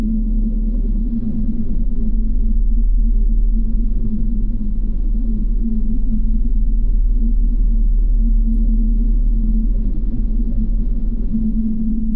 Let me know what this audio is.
Cavernous Drone
A hollow moan as if in a cave or dark dank dwelling. Manipulated/synthesised sound.